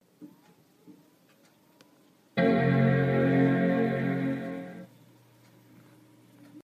Mac Start Up
The reboot/start-up sound of an iMac (27 inch, late 2012 model) desktop. Sound was recorded on an iPhone 5s.
electronic, iMac, desktop, power-up, digital, boot-up, Mac, noise, field-recording, reboot, Apple, computer